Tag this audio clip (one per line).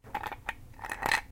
drink
clink
glass
crunch
ice-cube
click
ice